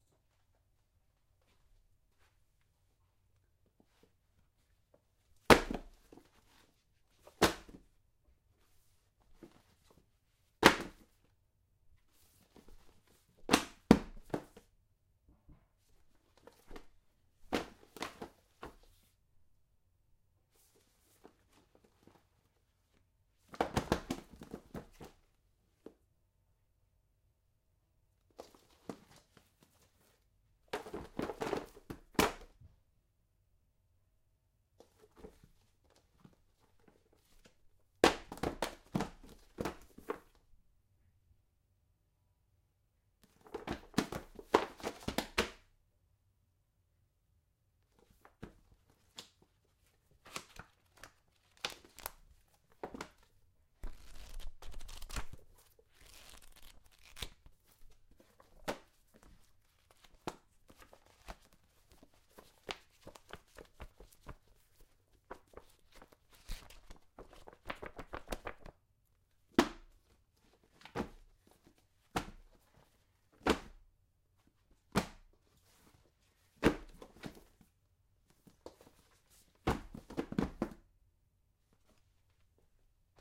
throwing pile of books on floor
As title, a bunch of books dropped on floor repeatedly.
books, clear, crush, de, design, drop, element, floor, focusrite, forte, high, hit, impact, nt1-a, paper, pile, quality, r, recording, sound, thud, versatile